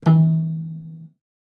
Upright Piano Pizz E Dull 1
A cool sound I made messing with an out-of-tune upright piano. The tuning is approximately "E."
Sample, Piano, Snickerdoodle, Keys, E